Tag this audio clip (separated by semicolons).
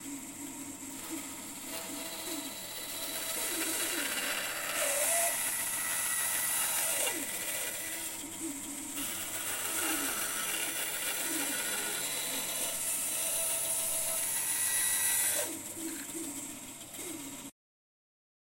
band; plastic; power; saw; tools